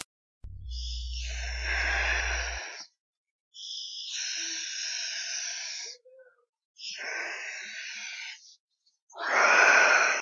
Xenomorph Noise
One of the many sounds I can make, no editing has been done to the sounds.
ish, Sound-Effects